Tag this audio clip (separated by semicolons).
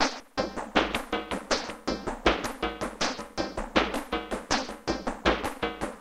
sequence; noise; arp2600; seq; metallic; synthesizer; synth; robotic; analog; hardware; arp; synthetic